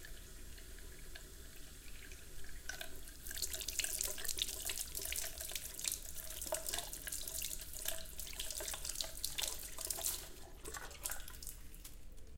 Washing hands in sink. Recorded on Zoom H6. Recorded close to the sink